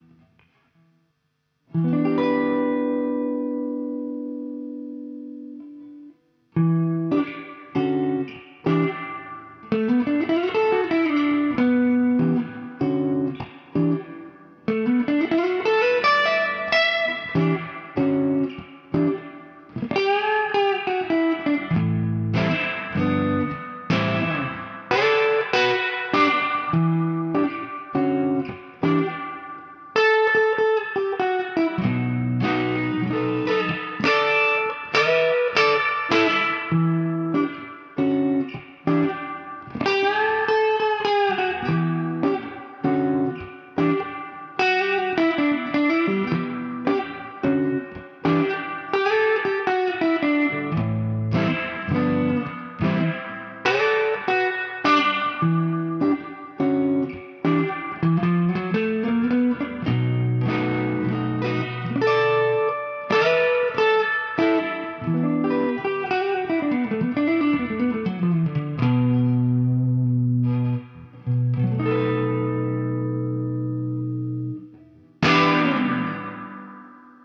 Telecaster midnight Blues st2
This is my second midnight blues improvisation on my hand-made telecaster. Clean signal with reverb, free tempo, Em tonality. Some little bit of lo-fi sound.
Enjoy.
blues, clean, electric, electric-guitar, experimental, free-tempo, guitar, improvisation, lo-fi, melodically, music, reverb, sound, telecaster